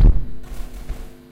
Some random FX Sounds // Dopefer A100 Modular System
fx, modular